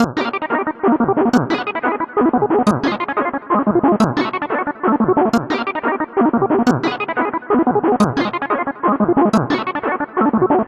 9 - choucroute sans garniture
Lousy chiptune short loop, sounds like an old-school game "open treasure" synth.
electronic, loop, synth, ugly